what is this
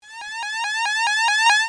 Alert!Alert!5
This sound was generated in SFXR.
bit, Sample, SFXR